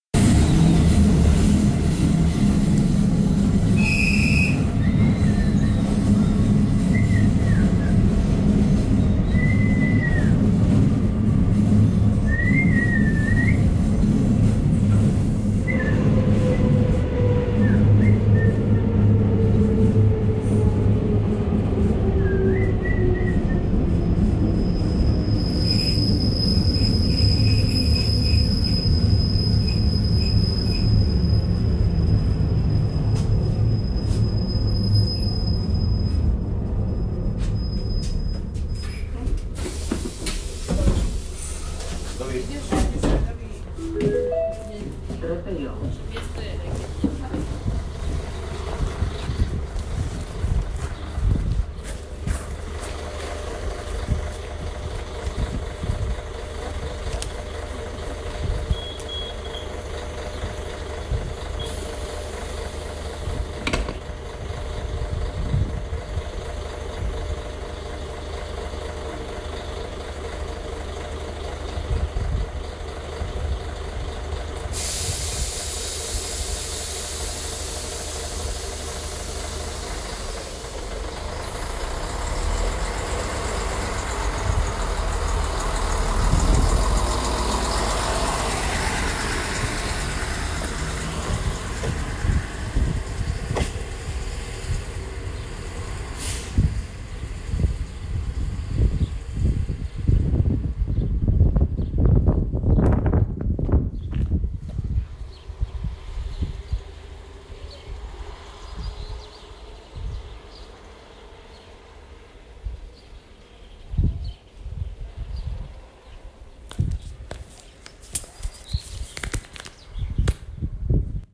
exit from motor train
som voices, whistle and wind, and steps, little reverb
machine, motortrain, outside, train